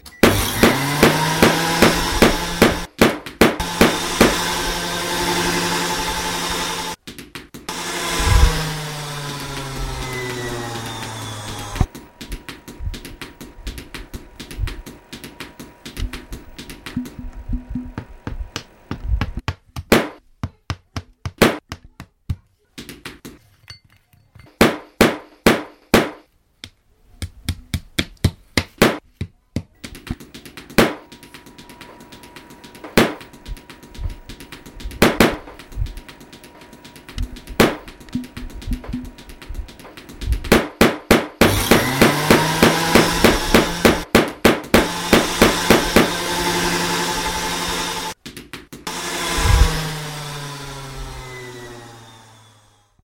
Sonicposcard LPFR Youenn Yann-Gaël Smahane
Here is a Postcard from students of La Poterie, Rennes in France.